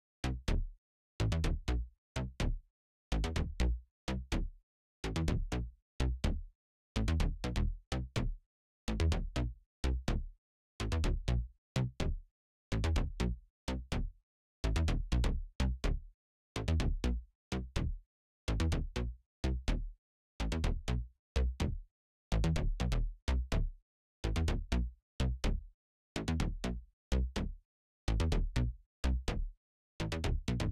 sint bass
experimental techno sounds,production